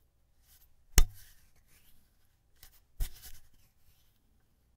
crowbar grab skin slide
crowbar, grab, skin, slide